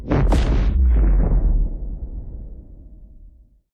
Sci-Fi explosion for different guns.
The Effect is created in Adobe Audition 2019 CC.
The source sound was a bomb explosion, which can be found in free access on the Internet without any rights.
Added effects distortion and Sci-Fi style.
sci-fi, futuristic, sfx, game, explosion, fx